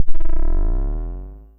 Vermona DRUM 2
From the Drum 1 Channel of the Vermona DRM 1 Analog Drum Synthesizer